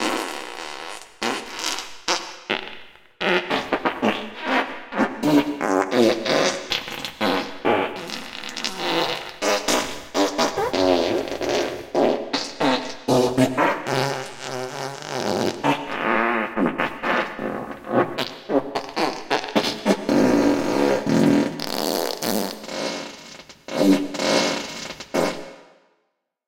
Fart Combo Fast - Reverb 01
High quality studio Fart sample. From the Ultimate Fart Series. Check out the comination samples.